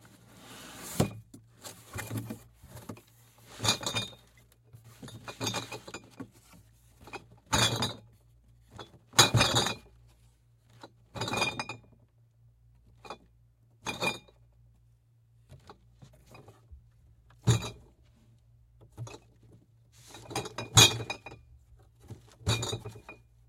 Opening, Unloading a Box of Bottles FF398
Opening box, taking bottles out of box, placing bottles on hard surface, glass bottles clinking
bottles, clinking, Opening-box